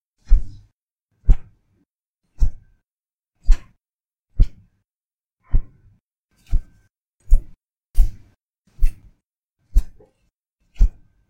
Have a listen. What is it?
dh woosh collection
I whipped a plastic ruler in front of my microphone. Wasn't as cartoony as I wanted, but I did what I could. Is there a way to make it sound more cartoony?